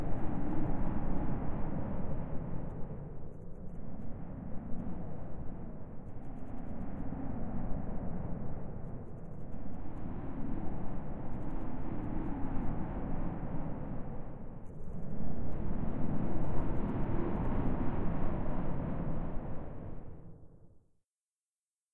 Made this with Horrorbox!
Check out Electronik Sound Lab's softwares - You can buy ESL's softwares HERE (i receive nothing)!
S/O to Electronik Sound Lab for giving me permission to publish the sounds!
Dark Scary Castle, Entrance.
If you enjoyed the sound, please STAR, COMMENT, SPREAD THE WORD!🗣 It really helps!
More content Otw!
anime, apparition, awakening, castle, dark, entrance, film, game, ghastly, horror, movie, scary, spooky